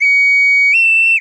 Not a recording, but a synthetic whistle sound similar to a boatswain's call (a.k.a bosun whistle), perhaps a wee bit longer than a true call to attention should be. No, I was never in the Navy, and that probably explains it. If you wanted to use it as a ring-tone, you would have to space it out, and I don't know if that means adding a few seconds of silence to the end, or what. Created mathematically in Cool Edit Pro.
attention, synthetic, ringtone, whistle, bosun